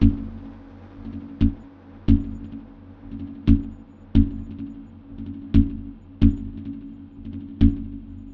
dub drums 008 resodelay
up in space, echomania
reaktor, dub, sounddesign, drums, experimental